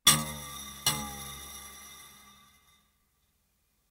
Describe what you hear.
hitting string metallic resonant